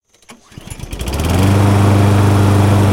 CM Lawnmower Startup 4
The sound of a lawn mower starting up.
cutter, engine, grass, landscaping, lawn, motor, mower, outdoor, start, up